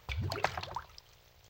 Glass on water